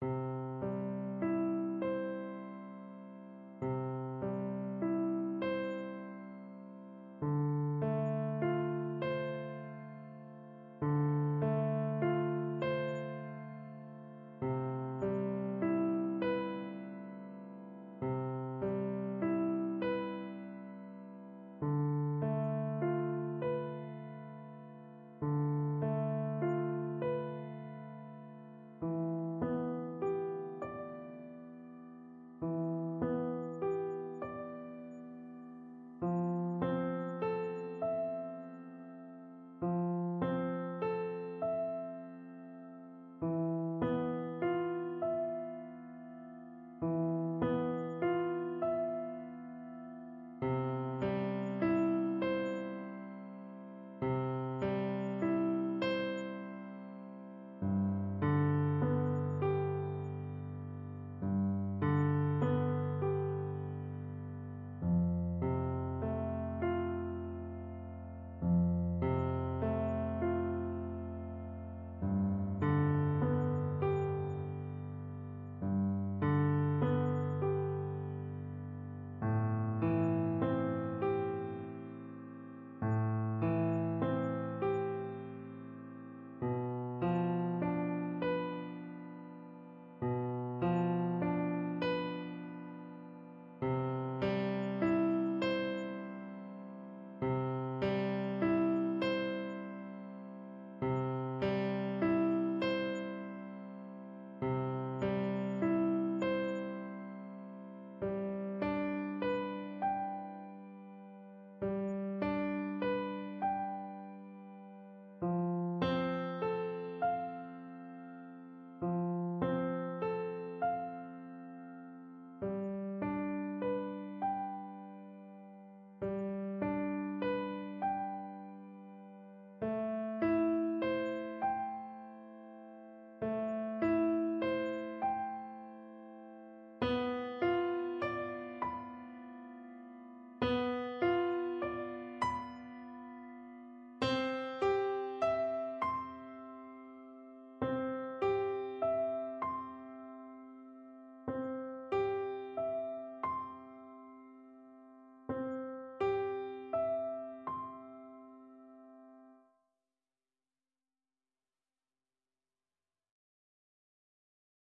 Late Spring
A simple melancholic melody
music, sad, simple, piano, melancholic